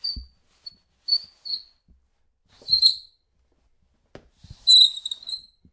ChiridoZapato Sound WET
Squeaky of shoes in a basketball arena
Squeaky, Sound